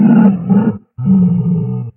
Crylophosaurus Growl
Again for terra lacerta.
Made with audicity by amplyfying sound, then useing base boost, after that I changed the pitched low.
Sound Made by useing my voice then modifying it.
Creepy, Crylophosaurus, Games, Horror, Scary